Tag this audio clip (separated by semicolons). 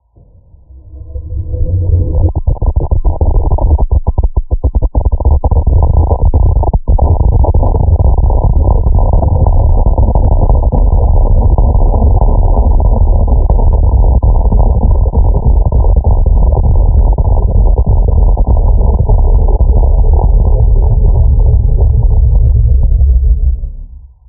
ambient; background; computer; Earthquake; effect; environment; explosion; generated; pitch; rocks; voice